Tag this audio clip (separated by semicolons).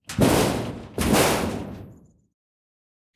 rod; hammer; percussion; bell; industrial; industry; rumble; factory; iron; hit; pipe; metal; shield; scrape; nails; steel; lock; shiny; ting; blacksmith; metallic; impact; clang